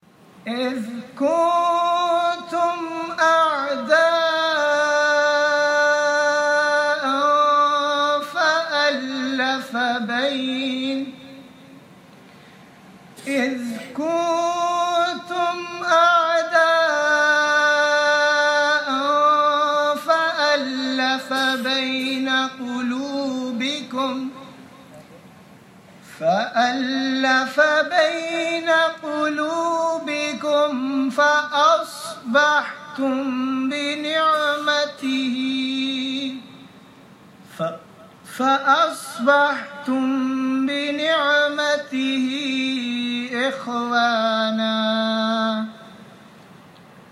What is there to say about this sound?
Aga Khan University Convocation praying, at Karachi, Pakistan